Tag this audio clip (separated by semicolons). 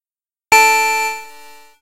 alarm; alert; beep; bleep; buzz; computer; digital; effect; electronic; future; gui; sci-fi; sound-design; synth